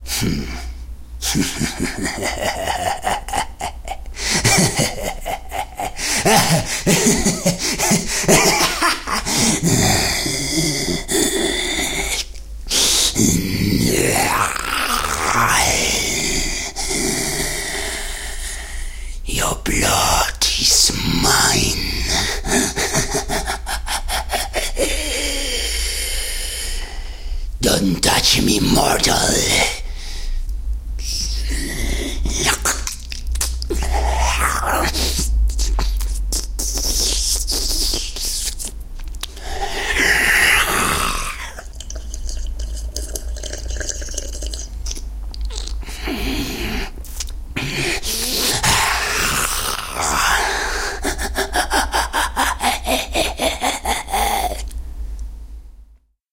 Laughter and phrases of an old vampire; includes bite and blood sucking! ;D